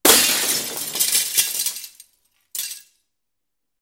Windows being broken with vaitous objects. Also includes scratching.
breaking-glass, break, window